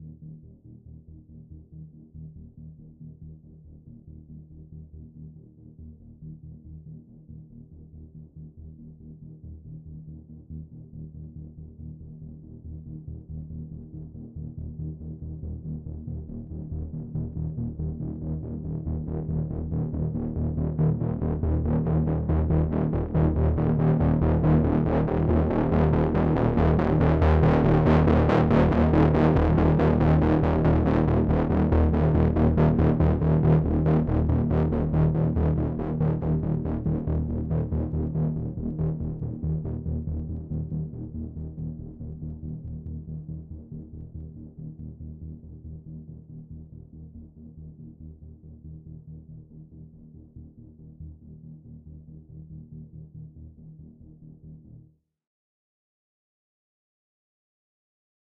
Pulsing Drone Ambience 2
ambient, atmosphere, pulse, pulsing, spooky, thrill